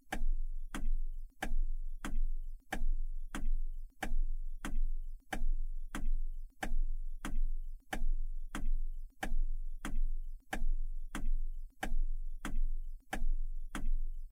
Turn Signal Int. Persp
turn, signal, click, tock, interior, tick